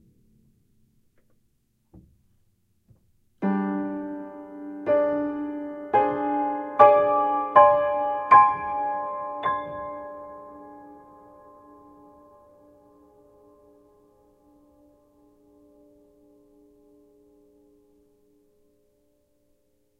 Ben Shewmaker - Haunting Chords
Not sure why I uploaded this one, just some random chords plunked down on a piano.
piano, instruments